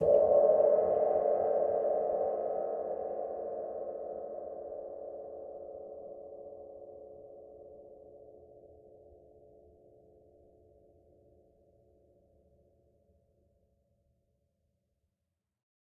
Single hit on an old Zildjian crash cymbal, recorded with a stereo pair of AKG C414 XLII's.